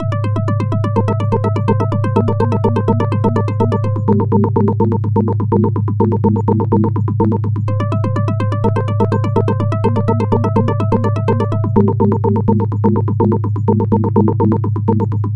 A simple tune which is different but catchy.
This was created from scratch by myself using psycle software and a big thanks to their team.
ambient, bass, beat, Bling-Thing, blippy, bounce, club, dance, drum, drum-bass, dub, dub-step, effect, electro, electronic, experimental, game, game-tune, gaming, glitch-hop, hypo, intro, loop, loopmusic, rave, synth, techno, trance, waawaa